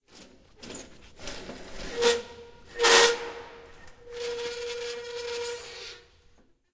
Metal squeaking chair
The sound of a metal chair/ short steps screeching around the ground. I leaned my weight on it and pushed down and forwards to get a good loud friction sound as the metal wheels scraped against concrete.